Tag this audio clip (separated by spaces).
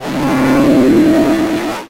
annoying speaking tts